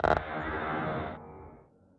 So it still sounds artificial and sci-fi, but a lot less menacing.
digital, glitch, reverberation, special-effect